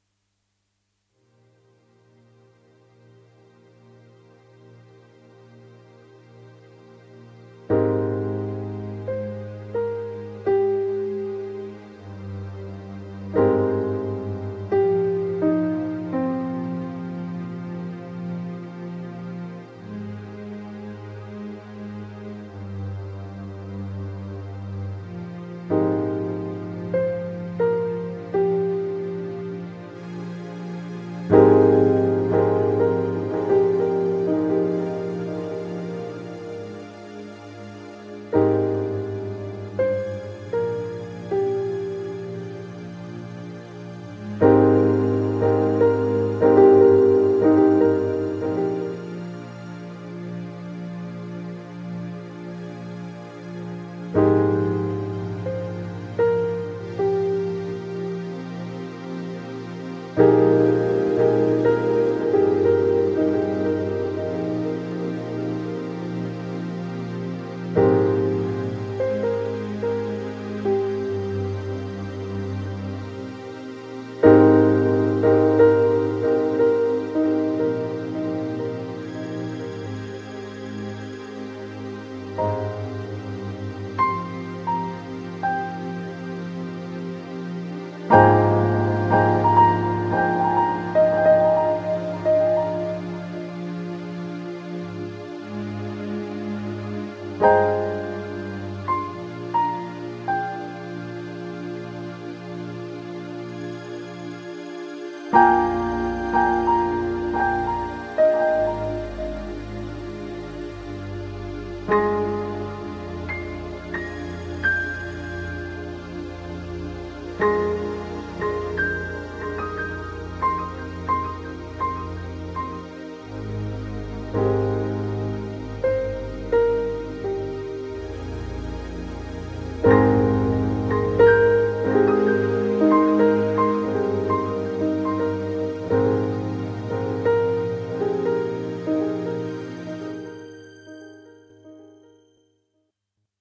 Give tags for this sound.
Atmospheric; Background; Everlasting; Soundscape; Wistful; Meditation; Perpetual

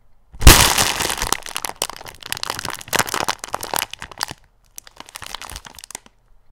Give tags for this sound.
crunch; gore; bone

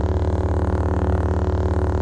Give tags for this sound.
star; wars

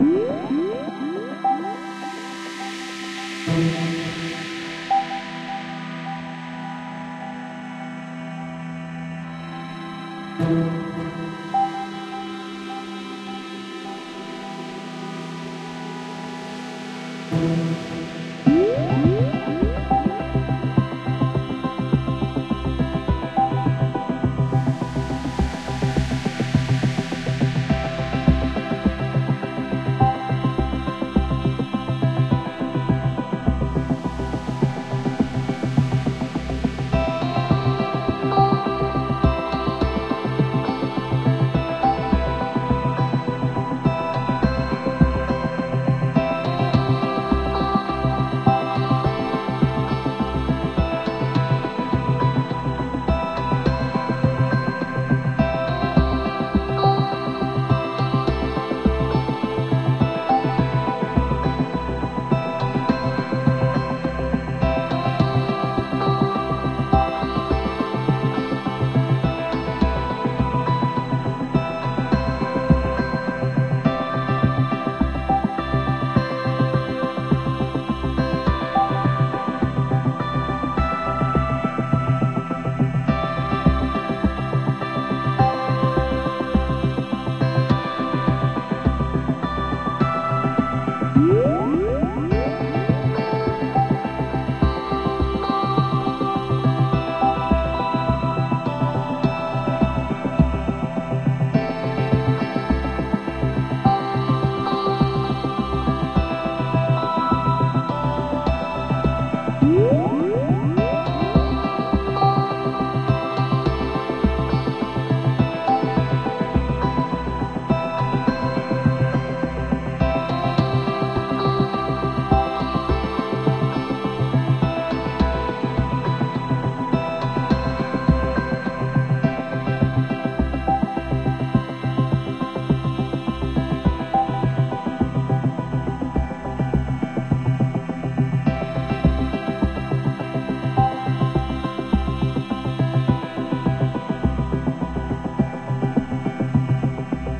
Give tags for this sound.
original; trance; chord; electric; electronic; acoustic; dance; ambient; clean; synthbass; synth; guitar; loop; base; bass; pop; electro

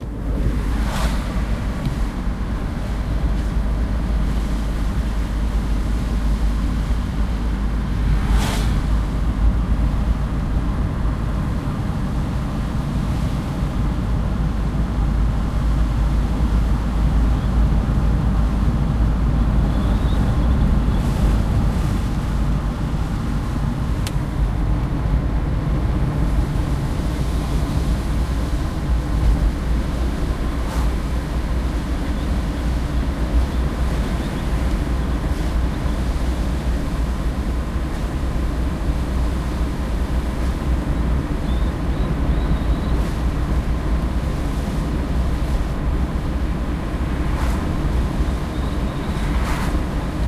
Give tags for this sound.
foley; traffic; street; field-recording; highway; ambience; zoom-h2n; driving; car; noise; cars; road; pass-by

